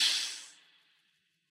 This comes from a drum synth function on an old mysterious electric organ. It also features the analog reverb enabled.
speaker, reverb, cabinet, analog, drums, synth